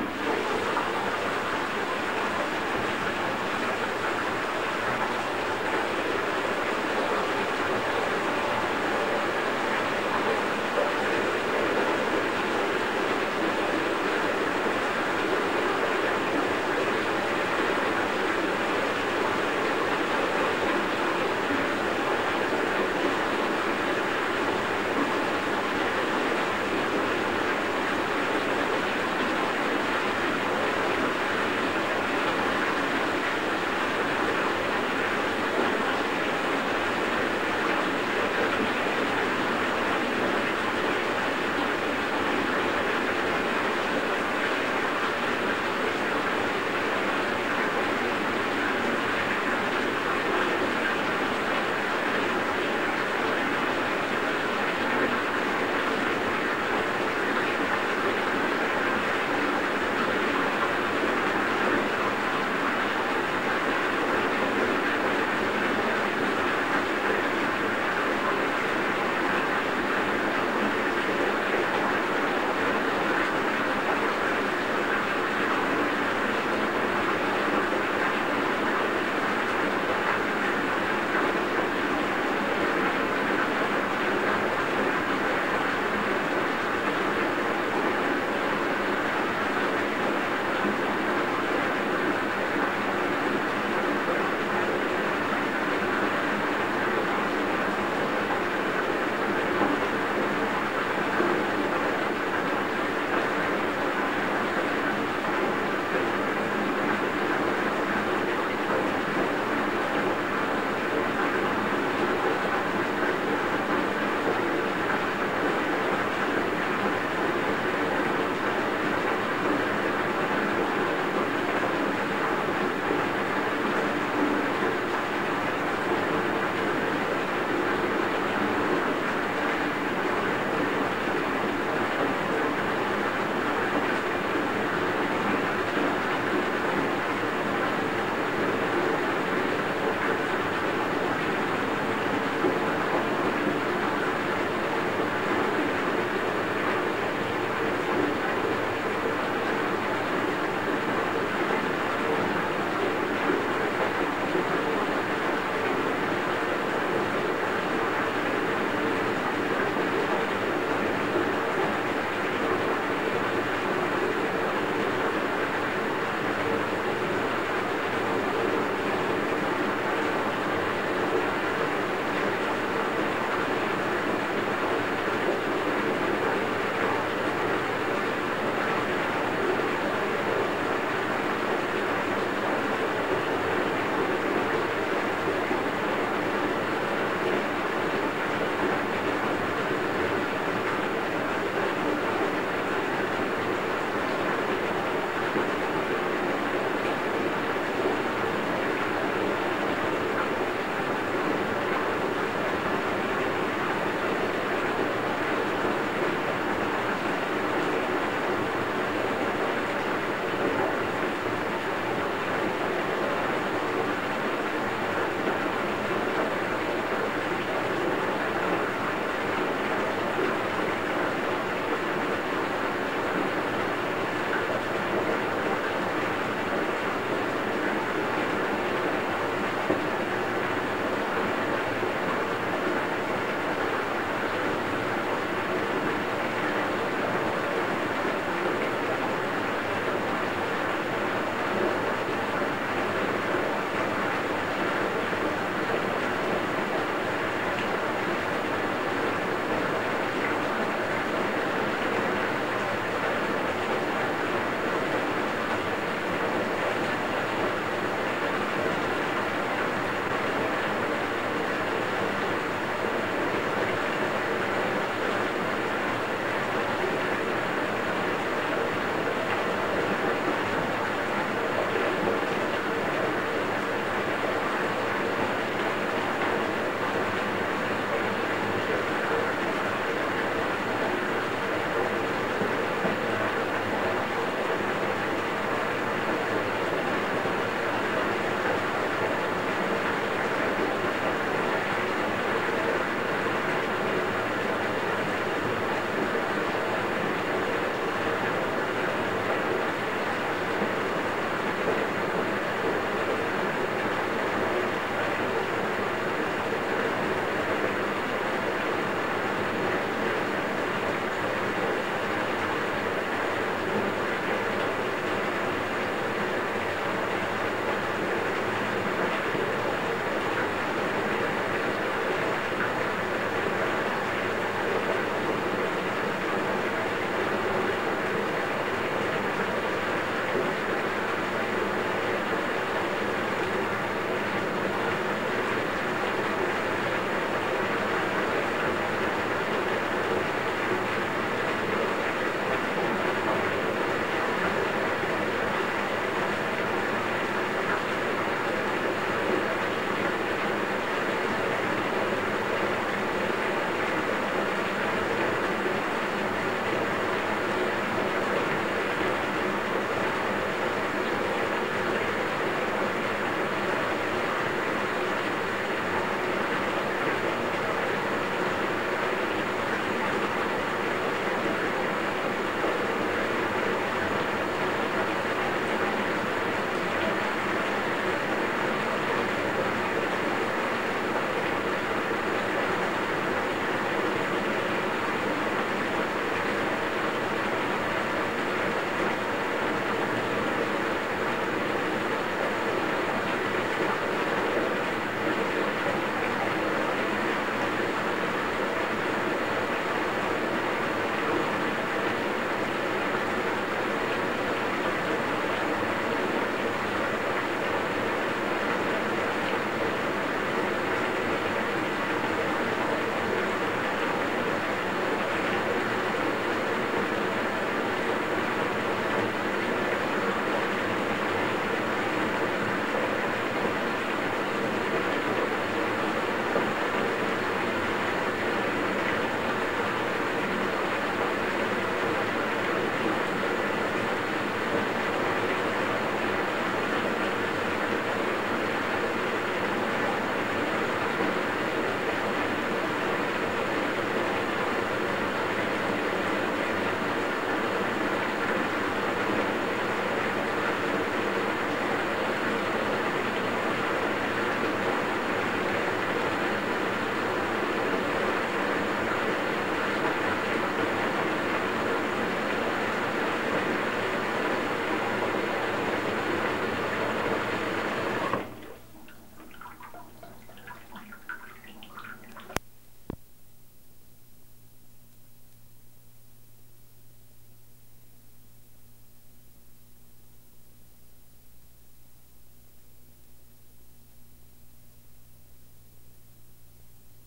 bath filling 2
8 minutes of a bathtub filling with water. Recorded at home for sound effects for a play.
bathtub, water